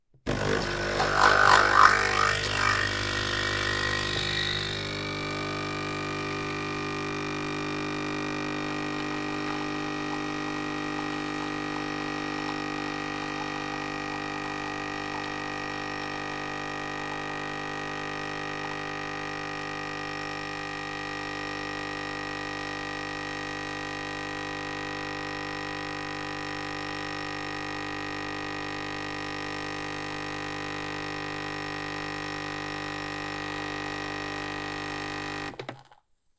Coffee Machine - Brew 1
Brewing from a coffee machine
kettle, water, cup, hot, appliances, espresso, steam, maker, boiling, coffee-maker, tea, brewing, kitchen, coffee, time, appliance, machine, boil, brew